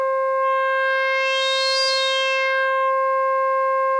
C1 Long Sustain SAT
C1 recorded with a Korg Monotron for a unique synth sound.
Recorded through a Yamaha MG124cx to an Mbox.
Ableton Live
korg, Monotron, Sample, sampler, sfx, sound, synth